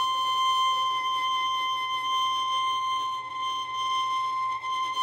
poppy C 5 pp sul-tasto
recordings of a violin (performed by Poppy Crum) playing long sustained notes in various expressions; pitch, dynamics and express (normal, harmonic, sul tasto, sul pont) are in file name. Recordings made with a pair of Neumann mics
high, long, note, pitched, shrill, squeak, sustain, violin